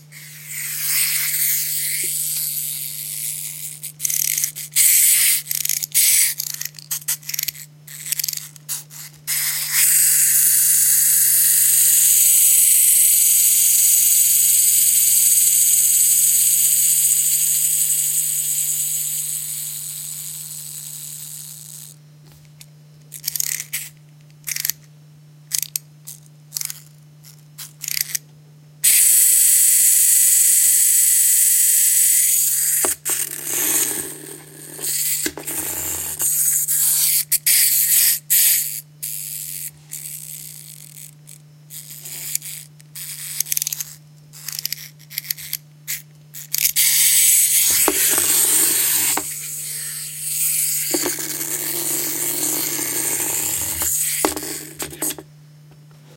A strange wind-up sushi toy. You wind it up and then it travels around bumping into stuff and changing direction. I recorded the wind-up mechanism being wound and released (with the wheels spinning.) I also recorded the toy rolling around on a table.
Recorded with a Canon GL-2 internal microphone.
roller, roll, mechanical, wind, request, toys, unprocessed, wind-up, toy